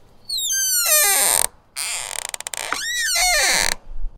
Door Creak sfx
Creak, Squeak, Open, Door